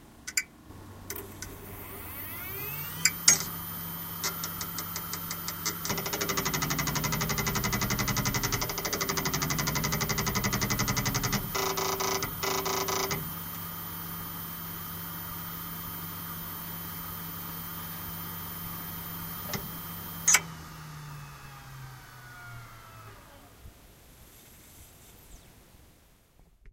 Maxtor Atlas 10K V - 10000rpm - FDB
A Maxtor server hard drive manufactured in 2006 close up; spin up, and spin down.
machine, disk, motor